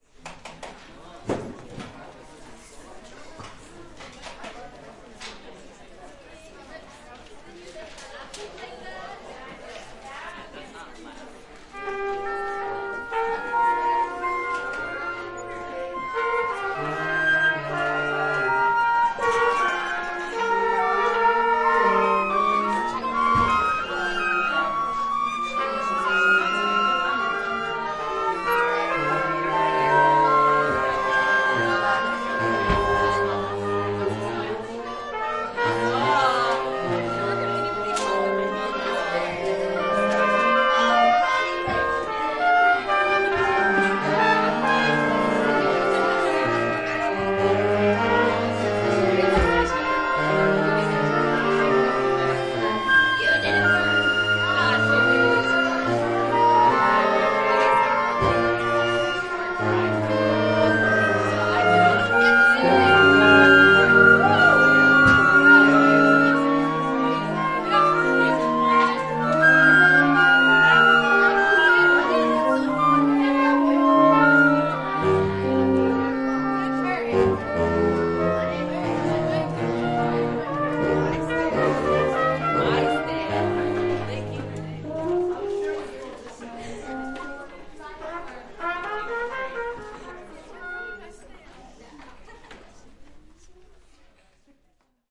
School Band Warm-Up
Middle school (ages 12-14) band of about 50 students preparing to make an audition recording in their school auditorium. Two AKG C 414 B-ULS multi-pattern large diaphragm condenser mics were placed about 10 feet (about 3 meters) above the ensemble on the far right and far left, with a RØDE NT4 stereo condenser mic at the same height located in the middle of the group. Recorded January 2019.
flute, children, warm-up, woodwinds, band, horns, trumpet, school, baritone-sax